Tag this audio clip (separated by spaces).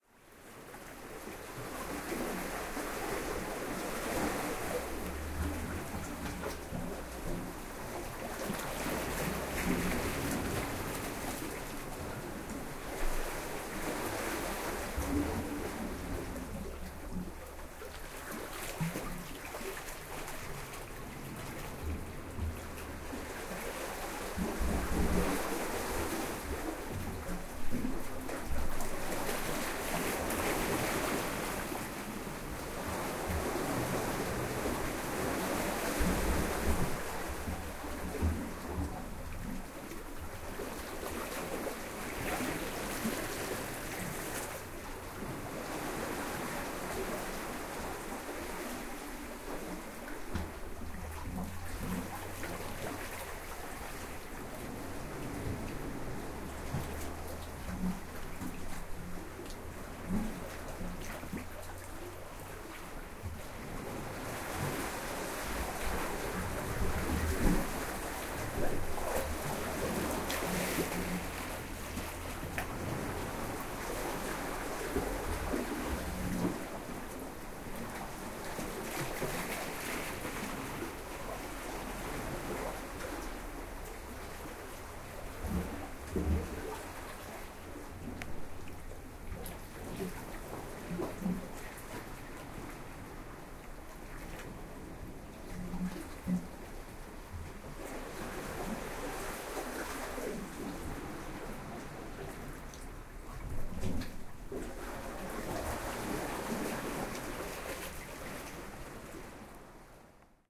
Sea-cave British-Isles Cornwall Atlantic sucking shore United-Kingdom Great-Britain coast cave Britain water field-recording sea booming ocean British surf nature beach ambience echo waves England